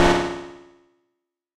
Modern Ui cancel
this is a little cancel sound effect i made for an old project, but might as well make it public. enjoy and have a good day
CANCEL, INTERFACE, MENU, MODERN, SCI-FI, UI